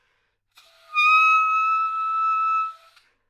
Sax Soprano - E6 - bad-richness bad-timbre
Part of the Good-sounds dataset of monophonic instrumental sounds.
instrument::sax_soprano
note::E
octave::6
midi note::76
good-sounds-id::5872
Intentionally played as an example of bad-richness bad-timbre
neumann-U87, good-sounds, sax, single-note, E6, multisample, soprano